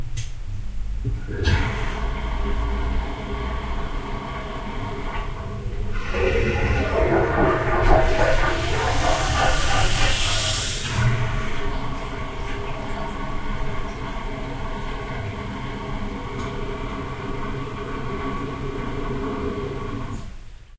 Japanese toilet1
Japan, Japanese, bathroom, electric, flush, flushing, toilet, toilet-flush, water, wc